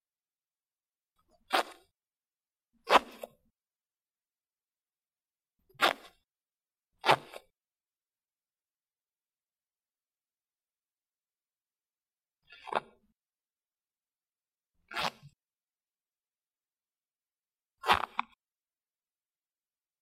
Hyacinthe jean pants zipper edited
jean pants zipper
jean, pants, zipper